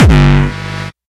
GNP Bass Drum - Ouchie Mc Grouchie
A kick drum ran through a Digitech guitar multi-fx unit.
bass-drum, distortion, gabber, gnp, hardcore, kick, kick-drum, single-hit